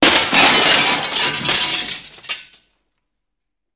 Windows being broken with vaitous objects. Also includes scratching.